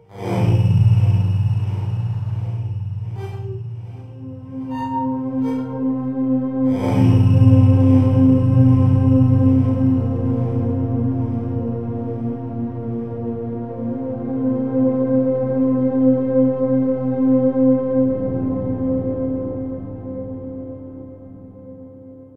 G Minor Chrush 3
Smooth, flowing synth pad sound.